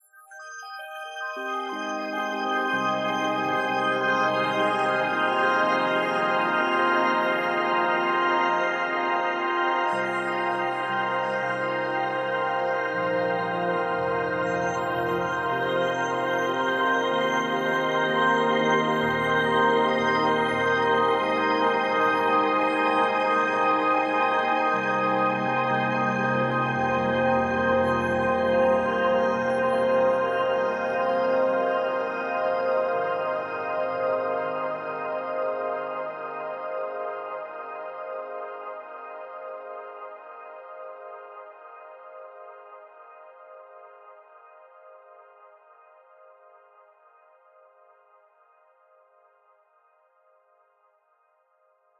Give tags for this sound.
twinkle ambient soundscape dreamy granular

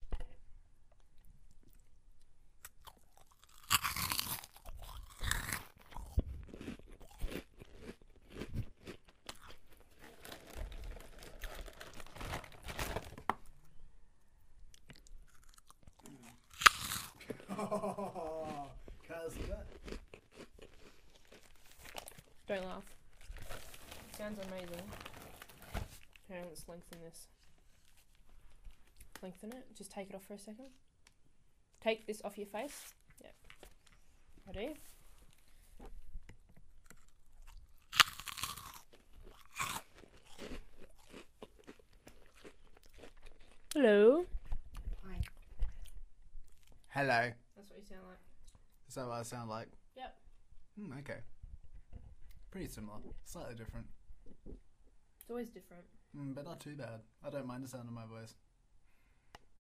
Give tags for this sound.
chewing chips crunch crunchy eat eating

